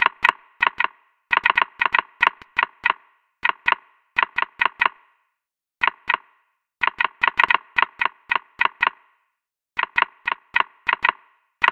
Virtual Keyboard Types
Sound of virtual typing
digital, keyboard, taps, typing, virtual